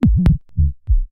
j3rk dual mirror core modular